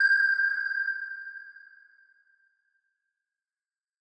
archi sonar 01
I created these pings to sound like a submarine's sonar using Surge (synthesizer) and RaySpace (reverb)
ping, pong, sonar, sub, submarine, synth, synthesized